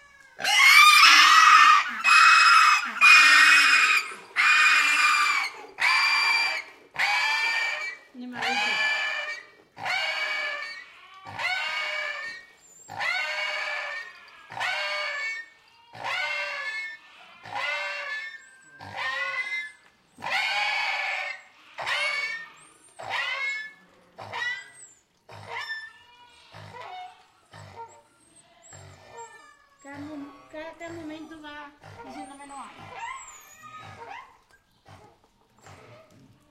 Content warning
Young pigs' slaughter. WARNING: this particular sample is very moving, at least for me. It begins with a cry and slowly fades out as the poor creature dies. Recorded in a country house's open yard near Cabra, S Spain. Sennheiser ME66 + MKH30, Shure FP24 preamp, Edirol R09 recorder. It was very hard for me to record this so I hope it's any use.
EDIT: I feel the need to clarify. This is the traditional way of killing the pig in Spanish (and many other countries) rural environment. It is based on bleeding (severance of the major blood vessels), which is not the norm in industrial slaughter houses nowadays (where stunning is applied previously to reduce suffering). I uploaded this to document a traditional practice - very cruel in my opinion - , for the sake of anthropological interest if you wish. Listeners can extract her/his own ethic/moral implications.